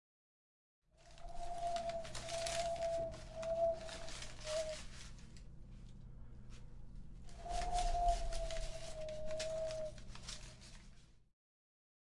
An ambient wind
atmosphere, ambient, noise, sound, wind, ambience